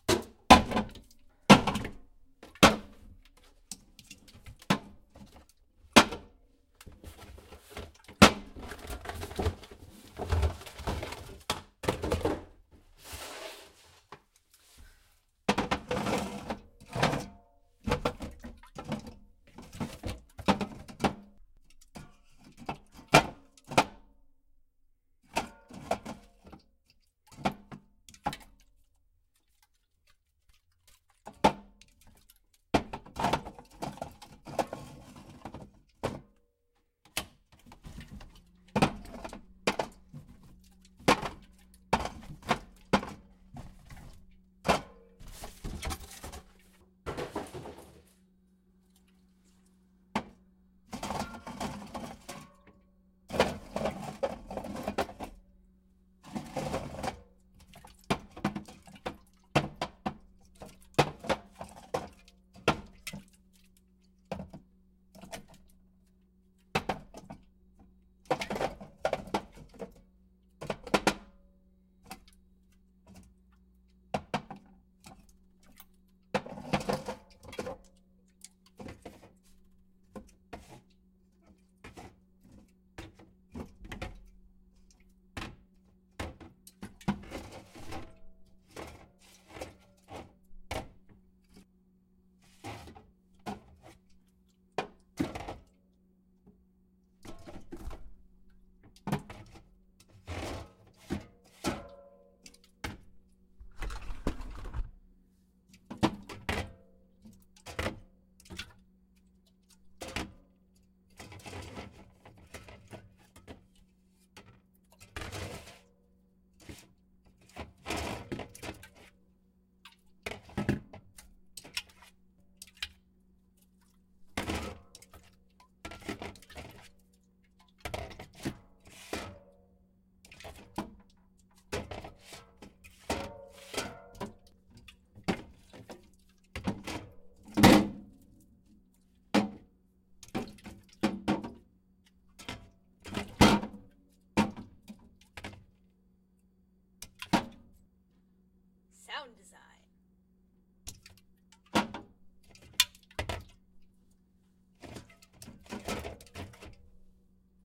soda cans in fridge
My handling soda cans in a fridge - picking up and setting down, pushing aside, bumping each other.
soda, can, cans